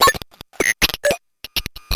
This is a short sample of some random blatherings from my bent Ti Math & Spell. typical phoneme randomness.
random, analog, phoneme, circuit, spell, bent